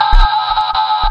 recording of a handheld game tronic device. i connected the device directly from the plate to the audio in of my computer. so the sound is kind of original. the batteries were little low, so the audio is mutated and sounds strange.
game-device, synthetic, handheld, saw, haribo, tronic, game